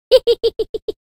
Funny high giggle
Recorded myself giggling and pitched up, originally for a fairy catching game.
fairy, fearie, fun, giggle, high, laugh, laughter, male, pitched, voice